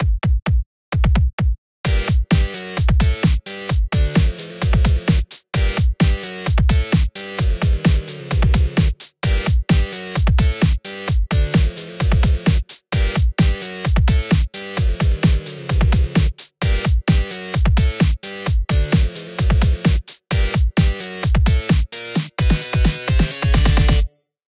8bit sample
8-bit, 8bit, Gameboy, chiptunes, drum, drums, game, loops, music, video